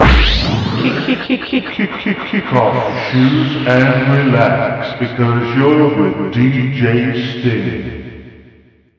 Sample 2 for DJ Sting as requested
FX: Pan, Intro, Fade
241187